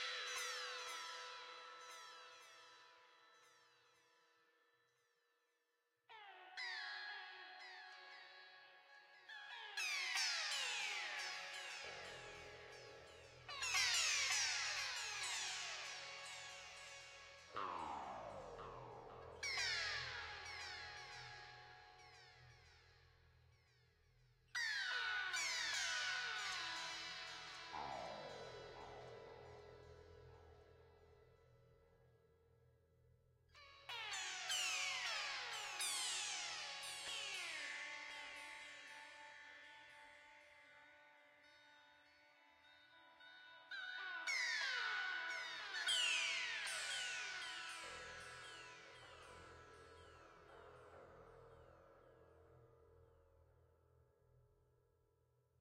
falling, portamento fX sounds created with the Roland VG-8 guitar system